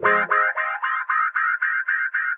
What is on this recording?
DuB HiM Jungle onedrop rasta Rasta reggae Reggae roots Roots